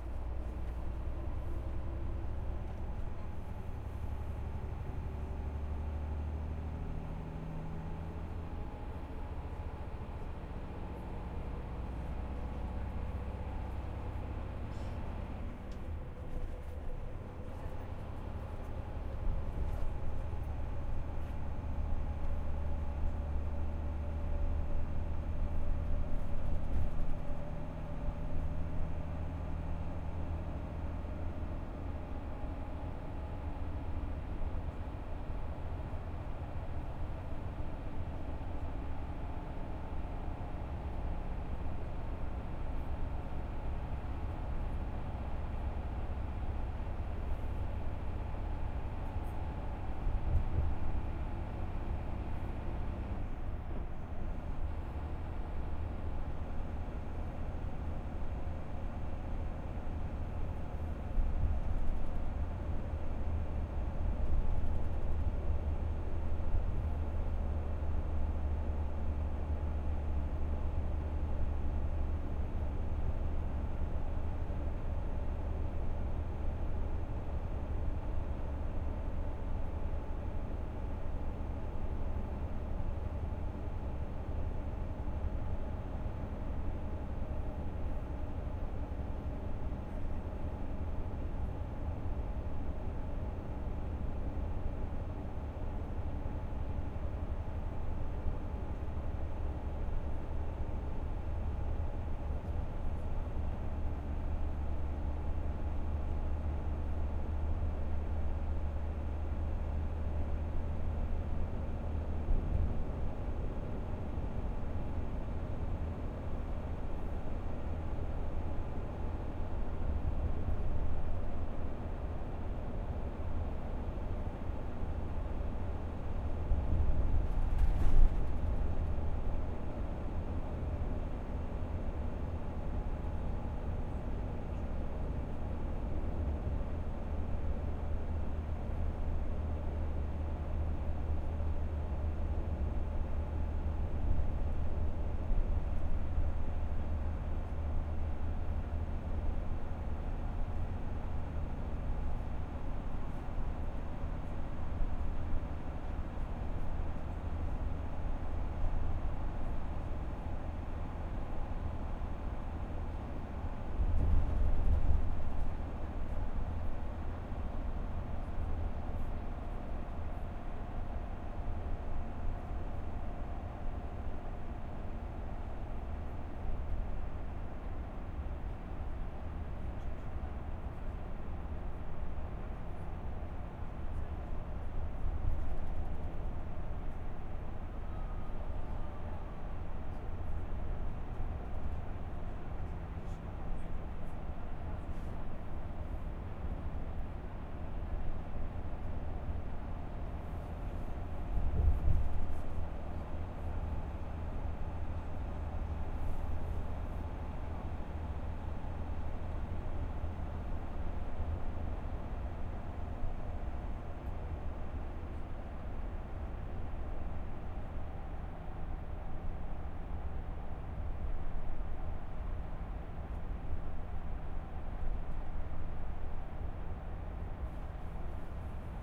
Ambience INT bus long-distance coach ride over german highway
Field Recording done with my Zoom H4n with its internal mics.
Created in 2017.
Ambience, bus, coach, german, highway, INT, long-distance, over, ride